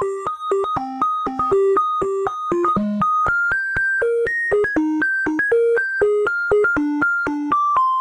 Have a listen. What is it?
Nord Lead 2 - 2nd Dump
backdrop, bass, bleep, electro, glitch, melody, resonant, rythm, soundscape